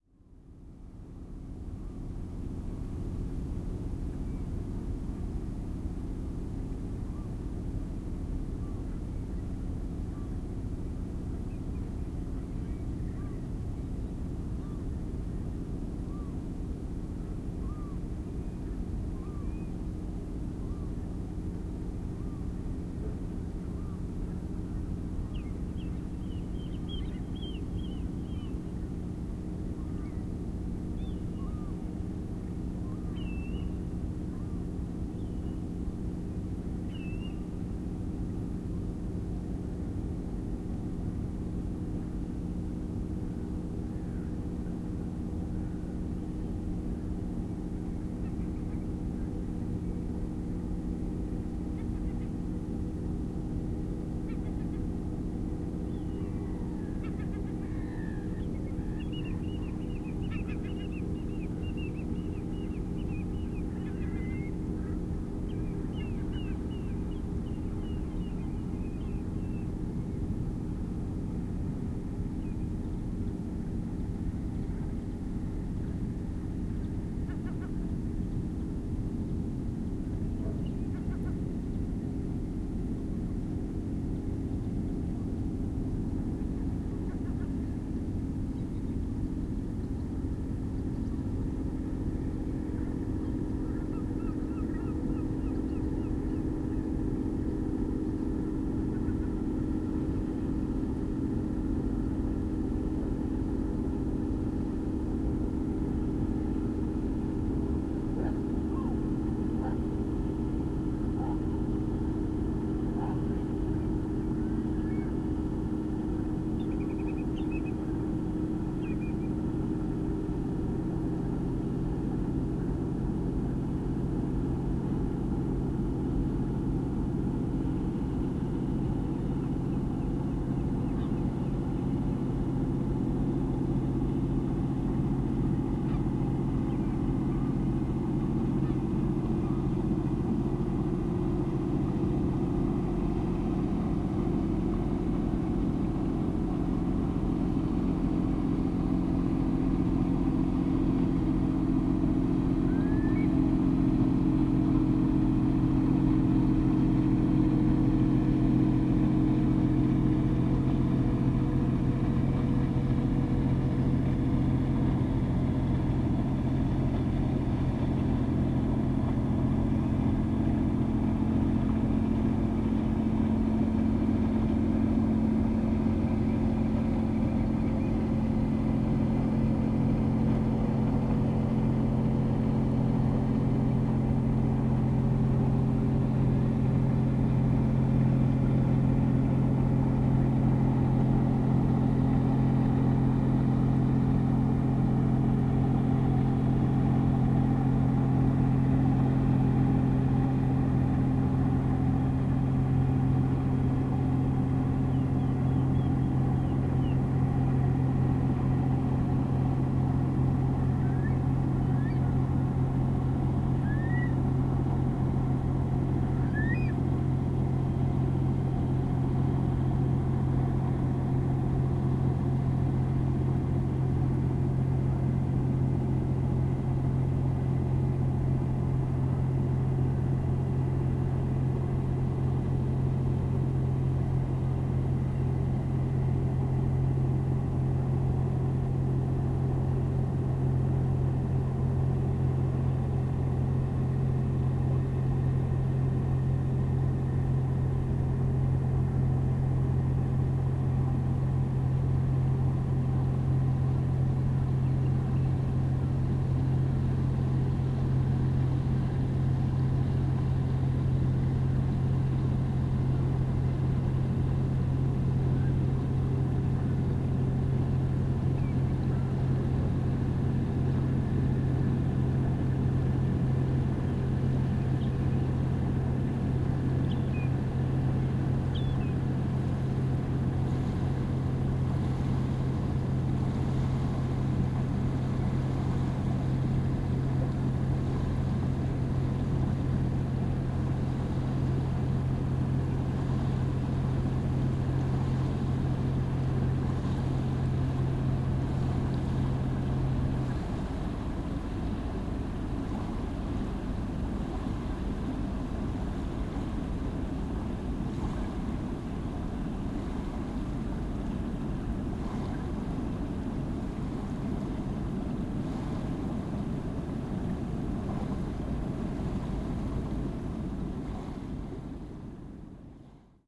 Distant small boat returning to marina
Small distant boat returning to Marina with the sound of wading bird calls and waves hitting the embankment. A man and his dog were on board though I cannot clarify if the dog barked or the man sneezed. Microphone positioned on an embankment in Hamford Water Nature Reserve, Essex, Uk. Recorded with a Zoom H6 MSH-6 stereo mic in winter (January)
outside-ambient, England, curlew, decelerating, waves, Hamfordwater, motor-boat, engine, uk, zoom-h6, stereo, h6, birdsong, Boat, Hamford-water-nature-reserve, msh-6, boat-engine, ambience, estuary, msh6, wading-birds, backwaters, sandpiper, hamford-water, accelerating, estuary-birds, essex, field-recording